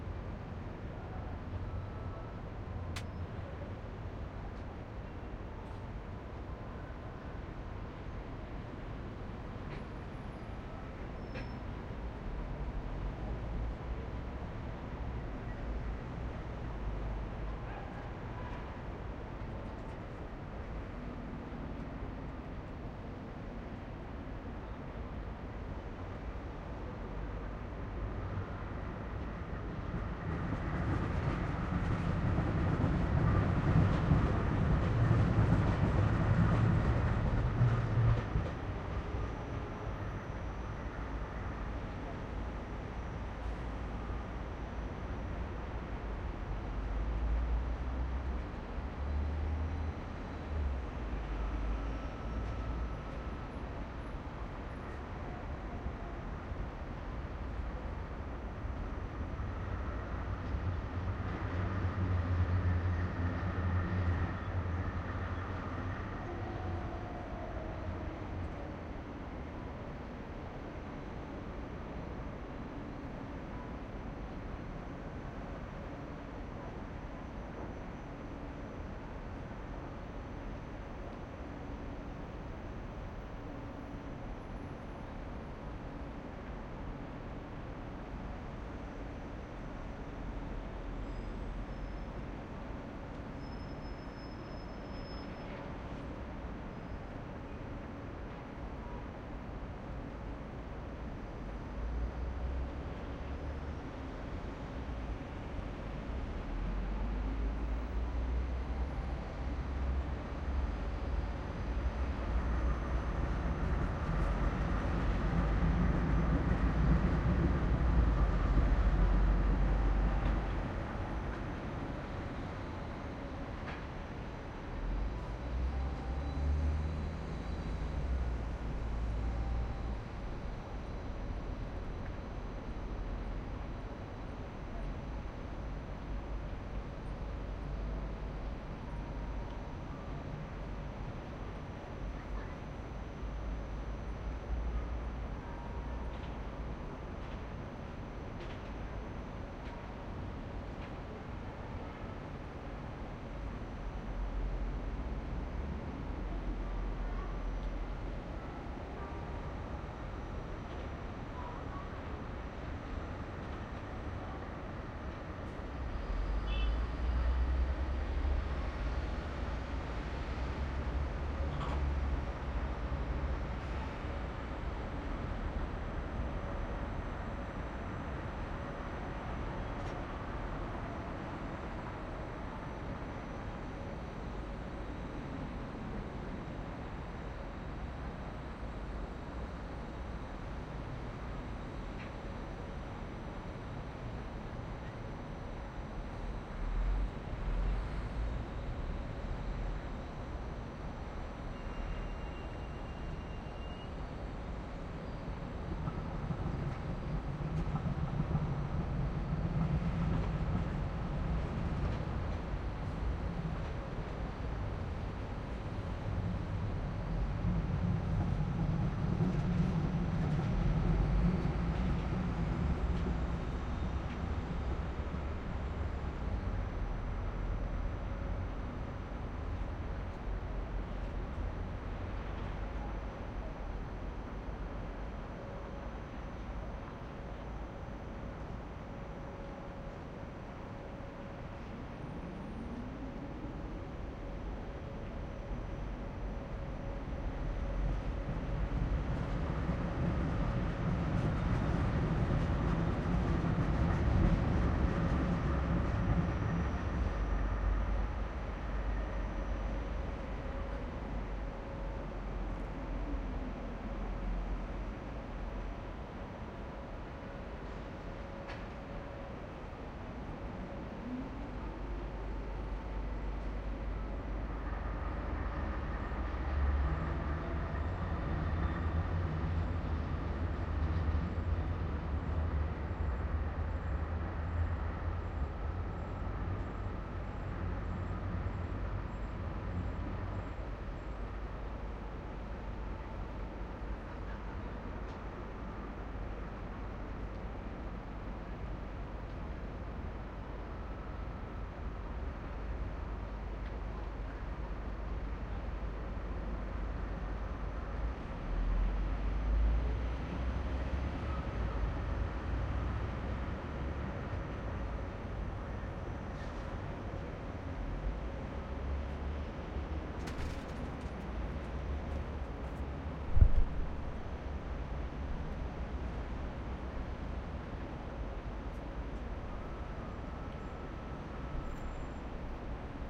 SKYLINE MS 03-01
This recording is don on the top flooor of clarion hotel in oslo. It is a ms recording with mkh 30 L and mkh 50 R.
ambient, soundscape, ambience